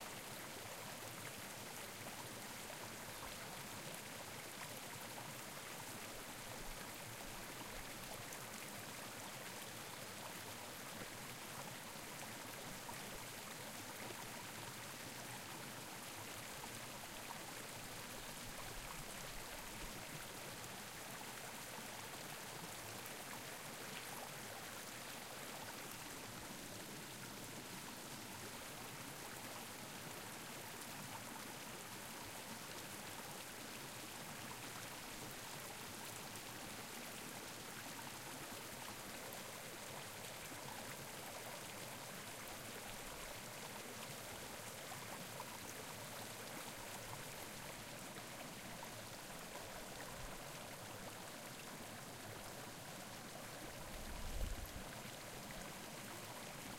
Little creek near San Andres Tepexoxuca, Puebla. Mexico
creek, field-recording, stream, water